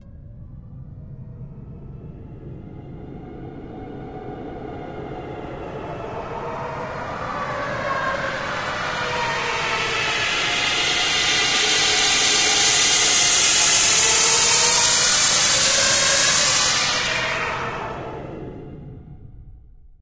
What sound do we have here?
Sweep (Flanging and Phasing) Centre to wide Pan
White noise sweep, put through a flanger and phaser. It starts at the centre of the stereo field, then pans out wide.